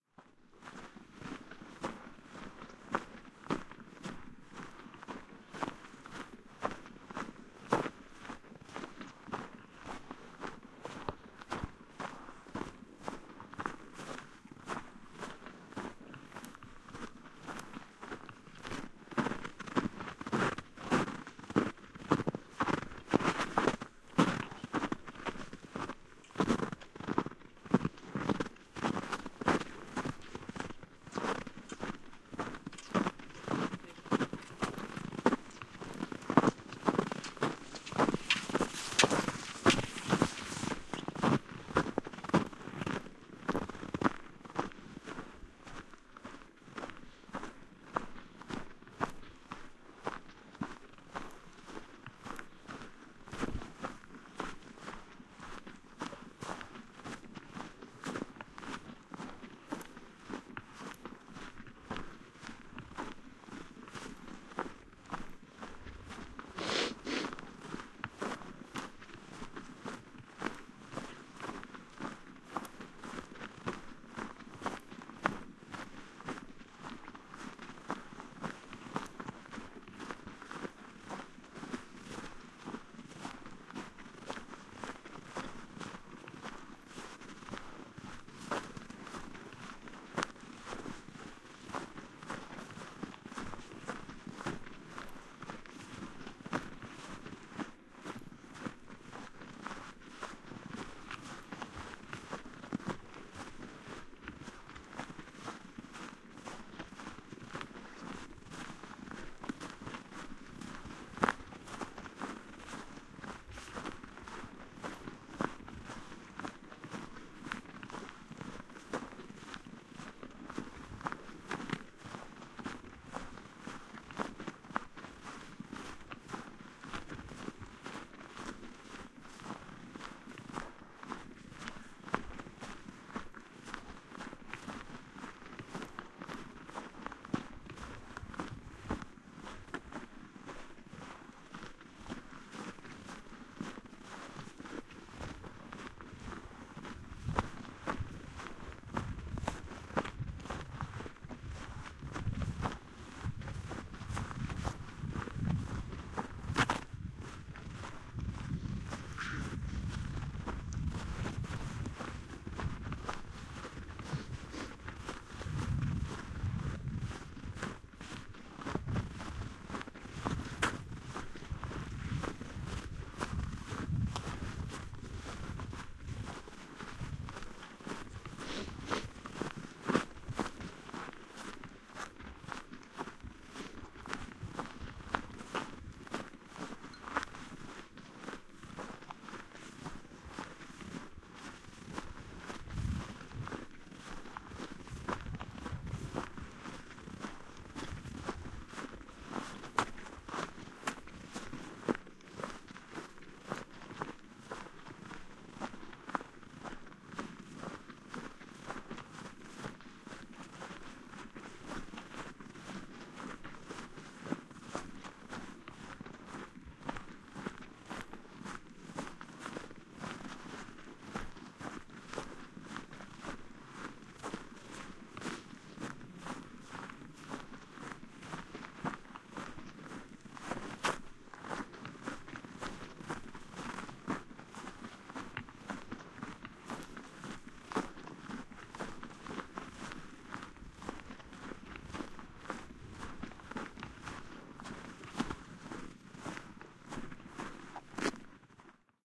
walking footsteps running
footsteps, running, walking